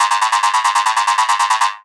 TR LOOP 0405
loop psy psy-trance psytrance trance goatrance goa-trance goa
goa, goa-trance, goatrance, loop, psy, psy-trance, psytrance, trance